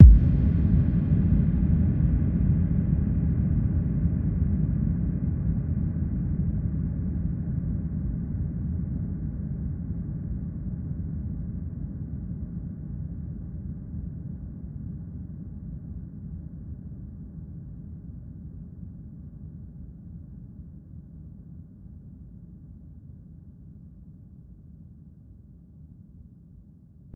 Big Reverb Kick 2
A kick Drum with a massive reverb tail
drum, kick, massive, reverb, tail